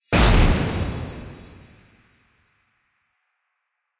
FUN-EXPLOSION09
A fun hit I used for several "instant" explosions in 2D animation.